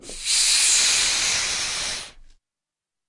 Balloon inflating. Recorded with Zoom H4